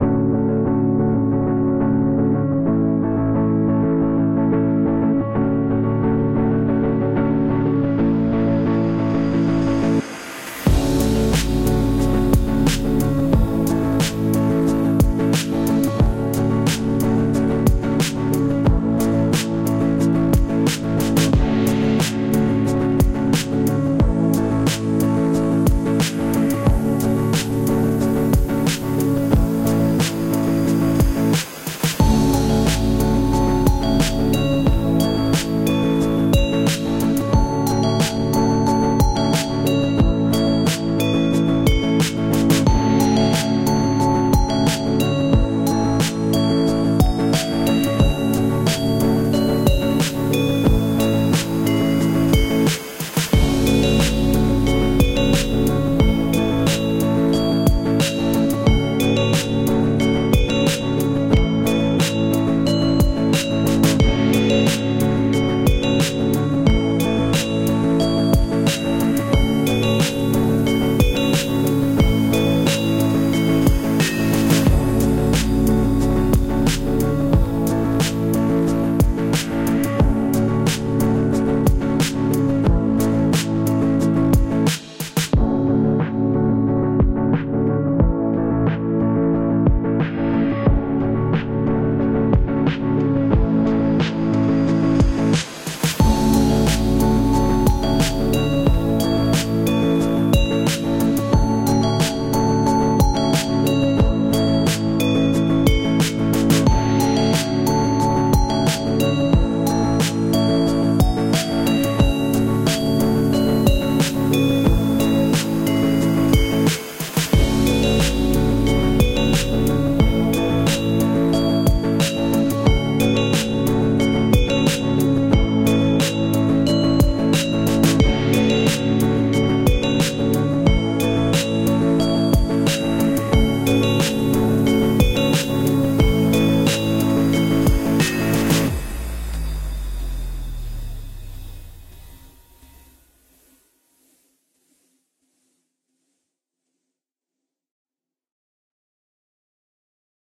Good Vibe Background Music
Some vibey music for you to use.
lo-fi, music, vibes, percs, hiphop, hip-hop, percussion-loop, free, loops, beat, garbage, loop, rubbish, song, cc, beats, quantized, lofi, groovy